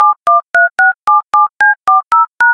push phone01
mobile, ring, call, phone, Japanese, telephone, push, Japan, calling